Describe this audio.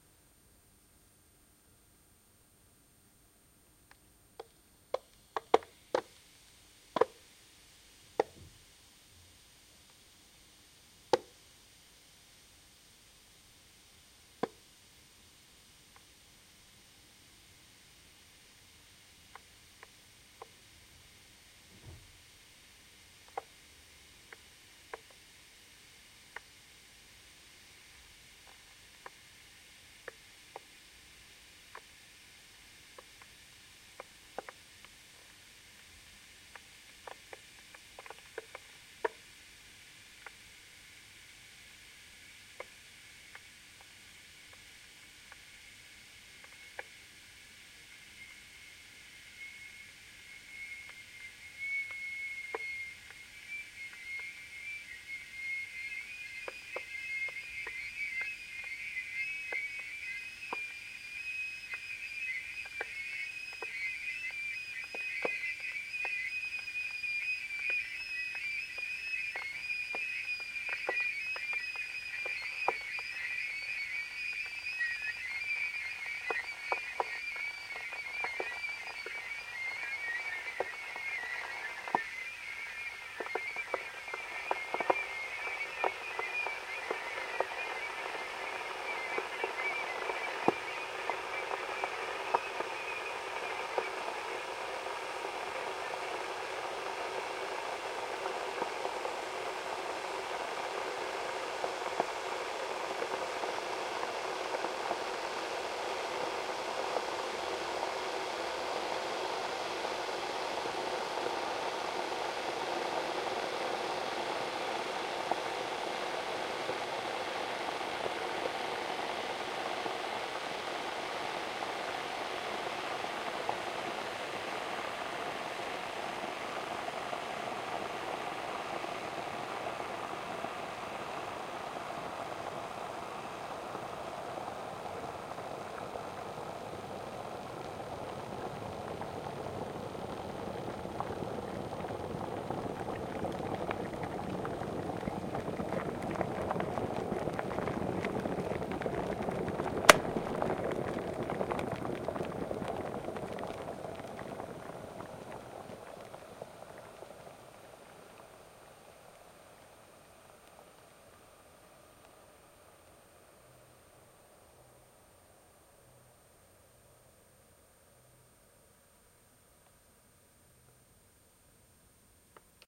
Heating cold water in an electric water boiler until boiling and automatic switch off. Notice the different sounds which develop during increasing temperature. I tried to repeat the recording (because of disturbing noise) but only this time those howling, squeaking tones appeared. I suppose they depend on the grade of calcarious sediments on the bottom of the device, the starting temperature or perhaps the amount of water. Surely one of the scientists among the freesounders can explain it. Sony ECM-MS907, Marantz PMD671.